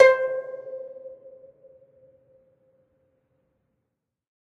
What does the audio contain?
single string plucked medium-loud with finger, allowed to decay. this is string 23 of 23, pitch C5 (523 Hz).
acoustic, flickr, guzheng, pluck, string, zither